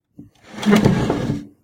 Chair-Stool-Wooden-Dragged-12
The sound of a wooden stool being dragged on a kitchen floor. It may make a good base or sweetener for a monster roar as it has almost a Chewbacca-like sound.
Ceramic, Drag, Dragged, Monster, Pull, Pulled, Push, Roar, Snarl, Stool, Tile, Wood, Wooden